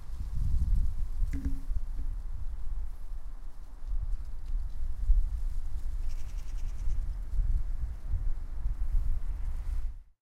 A recording of wind passing through dry leaves in Lund, Sweden. Recorded on February 15th, 2016.